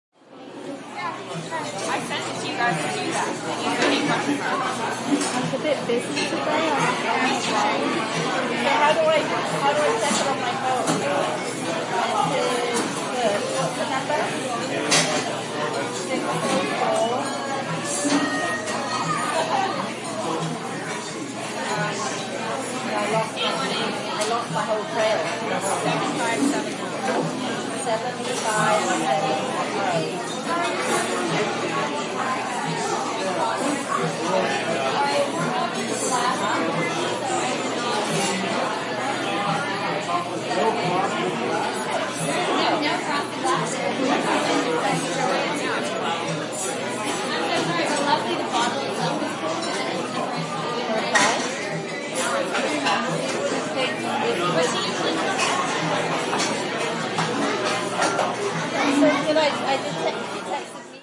restaurant - trattoria 2
crowd restaurant ambience
ambience, crowd, restaurant